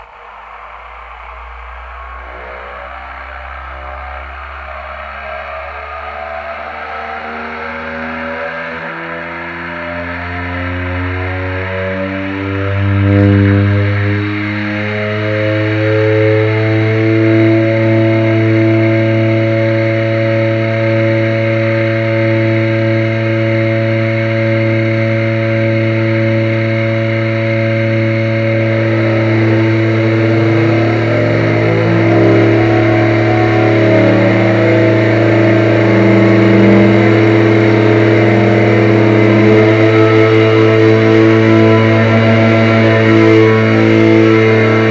Small two-engine airplane is tuning the engines. Turbo-prop. I hate fly with them because of the terrible and high noise level..
aircraft, engine, tuning